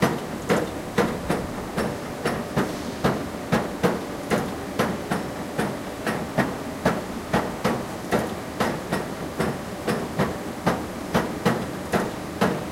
Machine in a factory (loopable)
A machine in a factory making rhythmic sounds (loopable).
loop, rhythmic, machine, industrial, rhythm